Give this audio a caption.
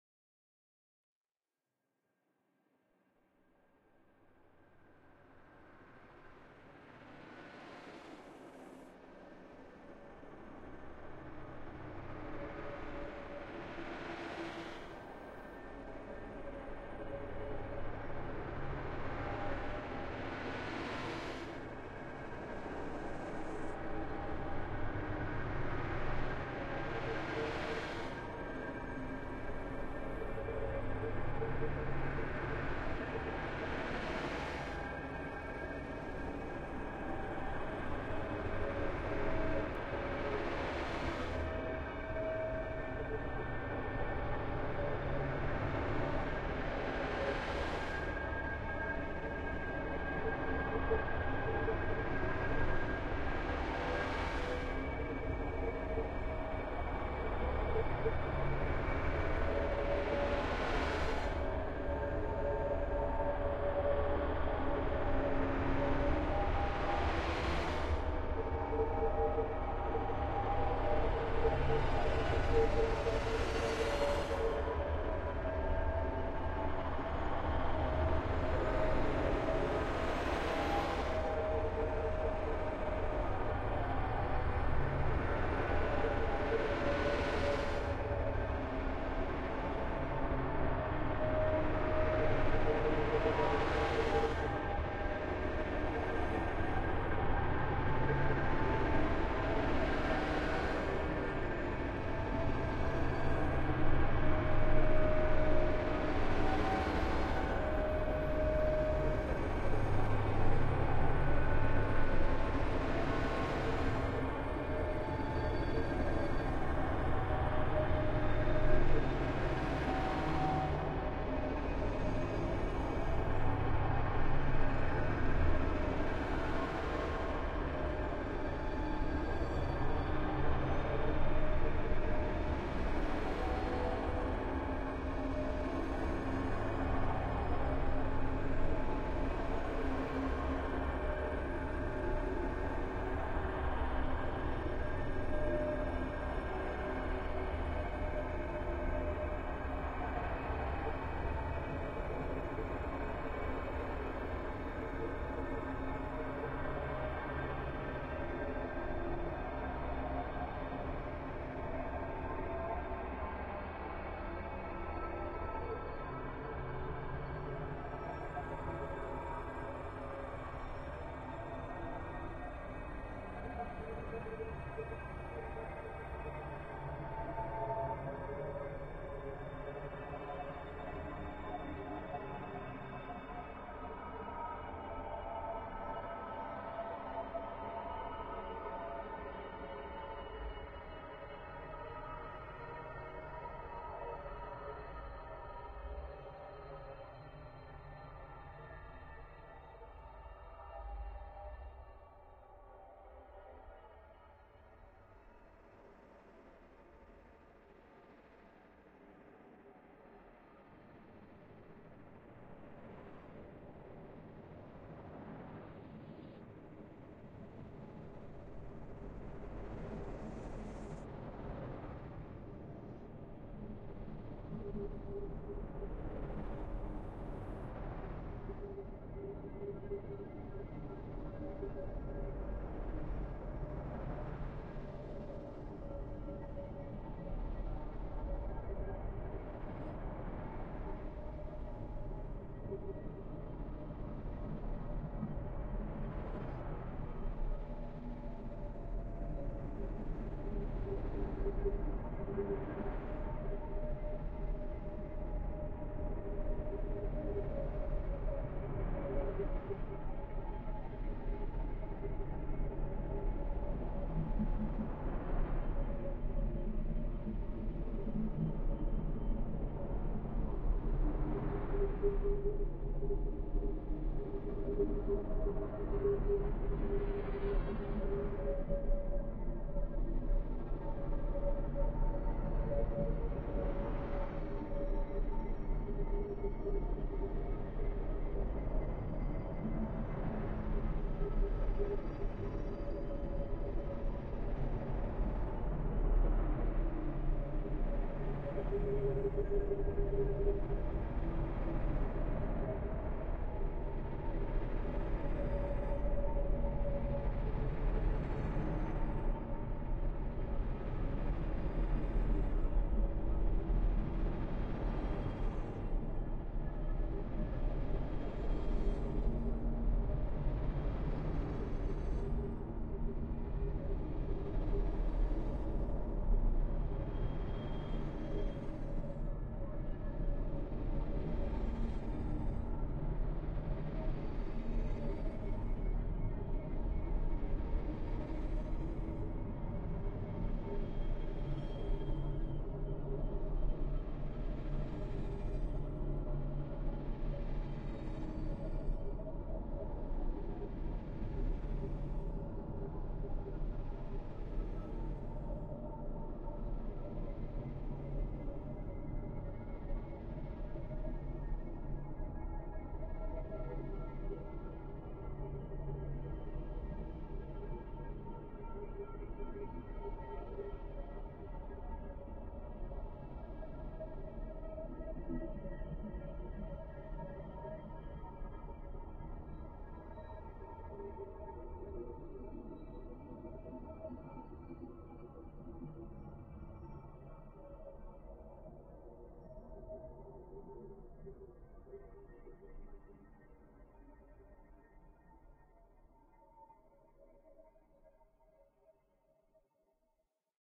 All Dark
Ambient file for something that makes you uneasy, on edge.
There's something around or near you that might cause a problem. It's something you can't put your finger on but the general weirdness of where you're at gives you the willies.
Audacity, MacBook Pro
alien, background, bizarre, dark, design, foreign, isolation, singing, unfamiliar